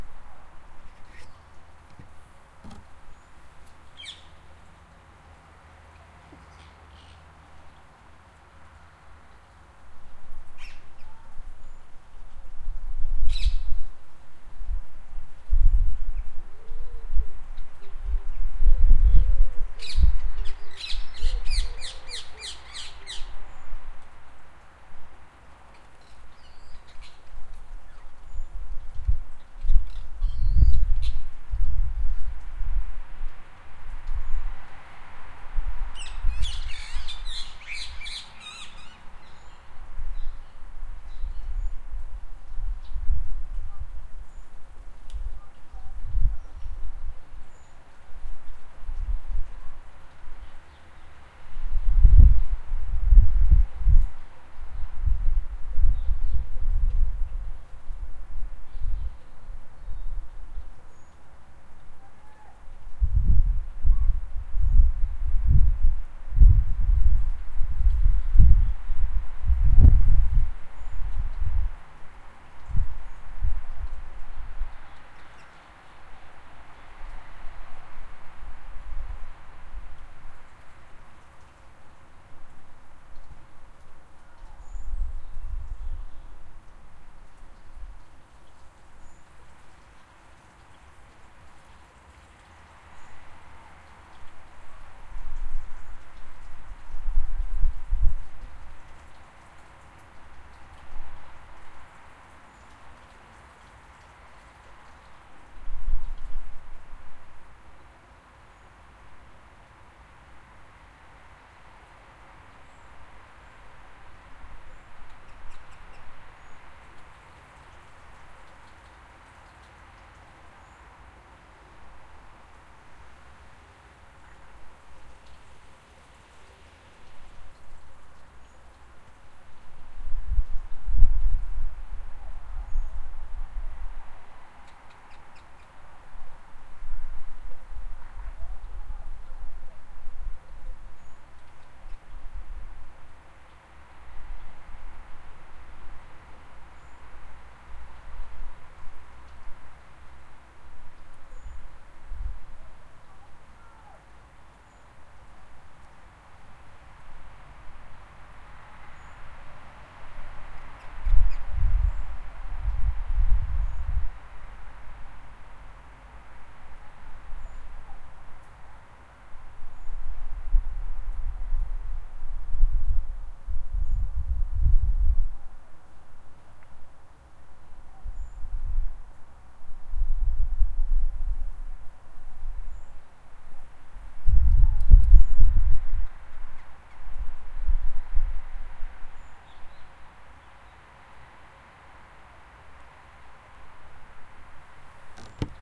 BGarden Aftern Jan 14
Recording of my garden midday. bird song, wind and some traffic noise.
This was recorded using a Tascam DR-40's internal microphones in A-B position.
outside, lawn, ambience, noise, traffic, ambient, birds, Back, garden, field-recording, nature